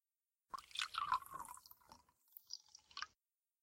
Pouring water into a small glass. Recorded with a 4th Generation Ipod Touch, edited with Audacity.
drip, liquid, pour, splash, water